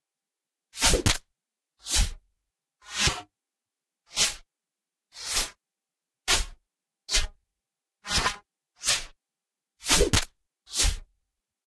blowgun - pipeblow - dart shotting
Loopable sounds of a blowgun made by me.
blowgun, darts, impact, shot, tube